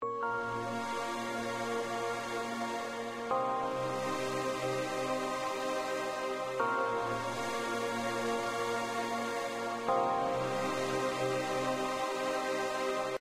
sample rising pad 146bpm 1
house pad breakdown
synth, electro, breakdown, ambient, electronic, saw, pad, loop